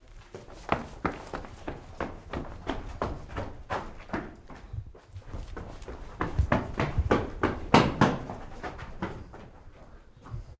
Steps stone 2(running)
Running on a stone surface.